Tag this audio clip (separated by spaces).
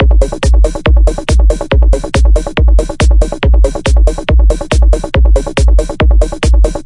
bass bassline beat club dance drum electro electronic hard house kick kickdrum loop psy psytrance rave techno trance